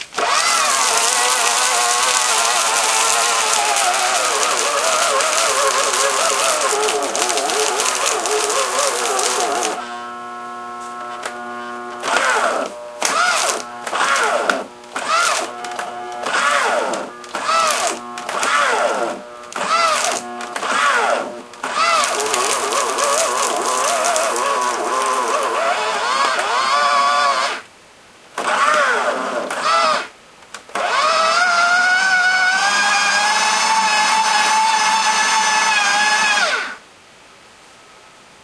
Killing the paper shredder with too many sheets. Then trying to get the paper out backwards and forwards. Etc.